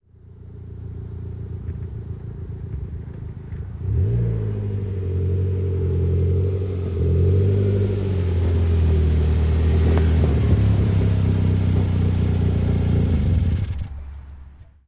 01 Renault duster Coming to snow outdoor enginestop
Sound of Renault Duster drive by on snow. Engine stops